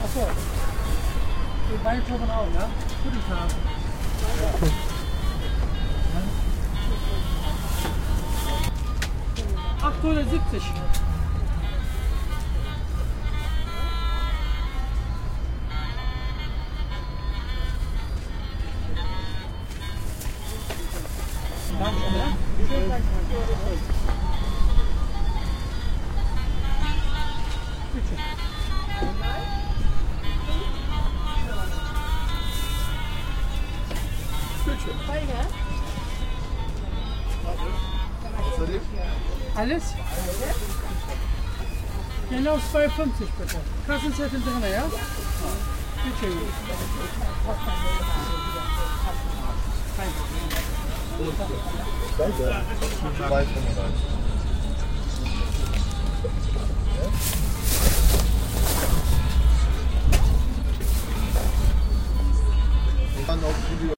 file- markt karl marx allee- koppenstraße 11.45done
marcet place karl marrx allee / koppenstraße 2009 13.
11.45 o´clock - u can hear a fruit salesman talkin and the weaky sound is from a cheap terrible santa claus puppet- the man says its good ;-) you also can hear arabian music sometimes in the back